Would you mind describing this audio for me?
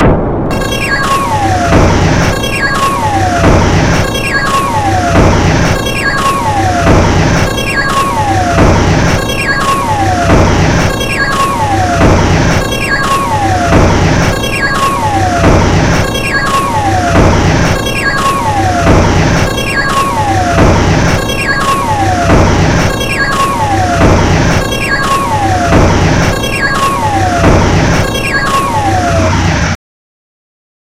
a background sound i made in lmms
ambient, lmma